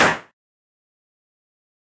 a snare made in FL Studio
This is a sound from a samplepack